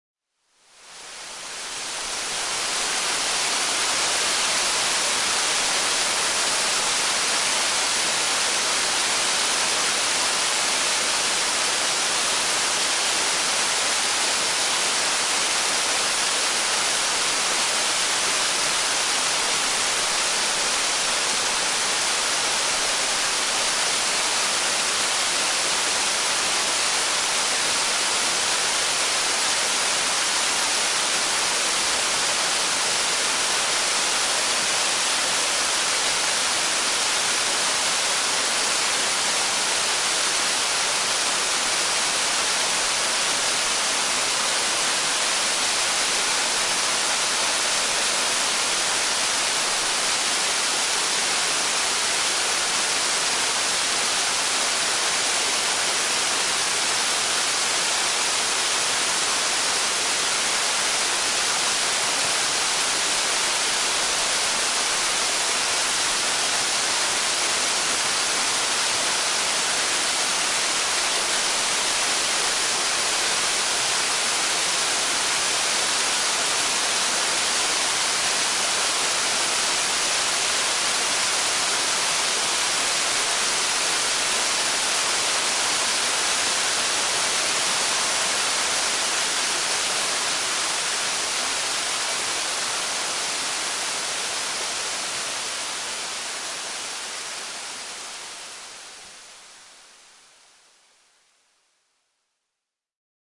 Medium-sized waterfall in Northern Spain (Burgos)
This is a 10-meter-high waterfall formed by the small river Molinar in Tobera, a beautiful tiny village in the mountains of Burgos (Spain). Quite a refreshing sight.
Recorded with Sony PCM-D50.
cascade, field-recording, water, waterfall